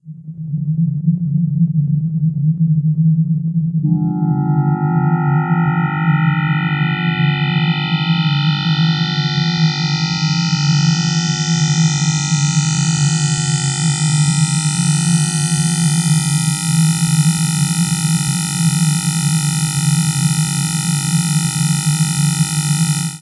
Out Of Phase
sound, sci-fi, effect, fx, supercollider